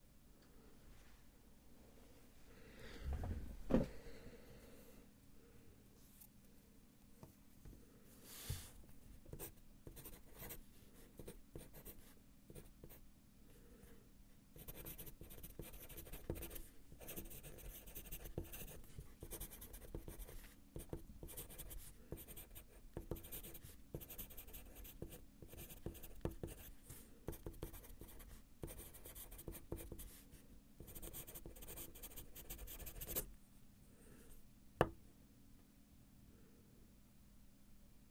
Pencil on paper. Recorded with a Neumann KMi 84 and a Fostex FR2.
drawing, write, writing, draw, scribble, pencil, paper